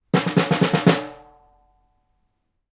Snare Drum, Multi Hits, A (H1)
Raw audio of striking a snare drum multiple times with a wooden drumstick. I recorded this simultaneously with a Zoom H1 and Zoom H4n Pro to compare the quality. The recorders were about 50cm away from the drum.
An example of how you might credit is by putting this in the description/credits:
The sound was recorded using a "H1 Zoom recorder" on 31st October 2017.
drums, percussion, drumstick, hit, hits, snare, drum